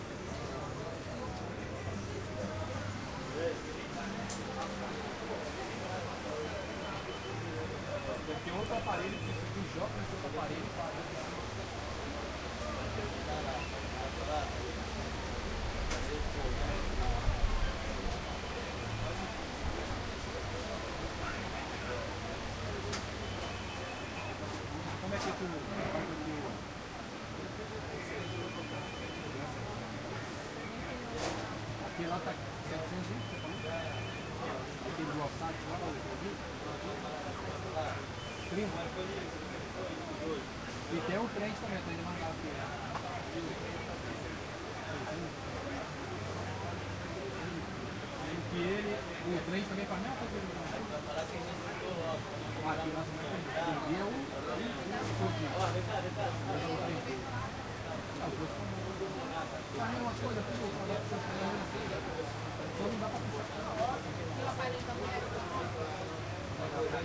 ambi -- Saara 2
Ambi from the public market Uruguiana in Rio de Janeiro, in the Saara neighborhood.
de, Janeiro, market, public, Rio, Saara